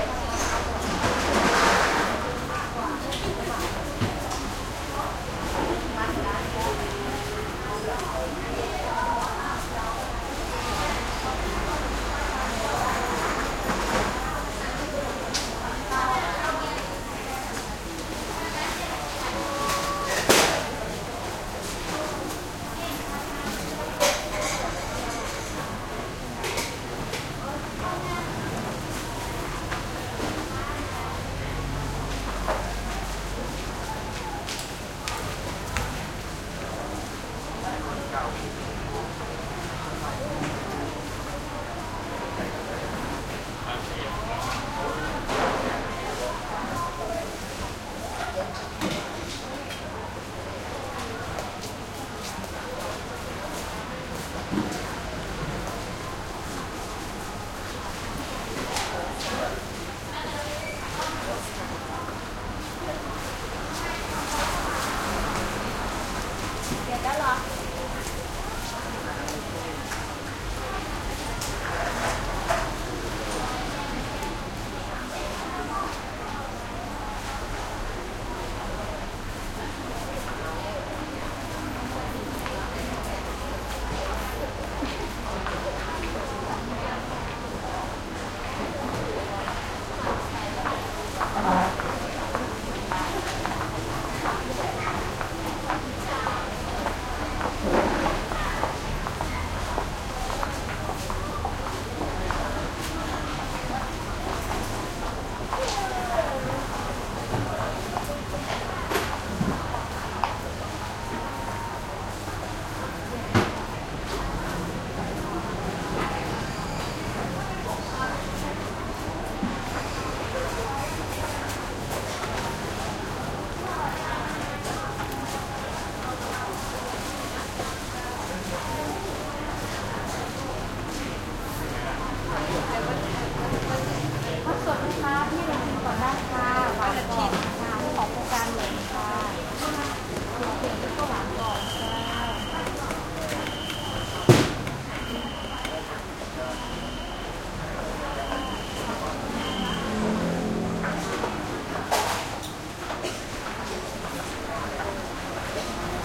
Thailand Chiang Mai market int light calm mellow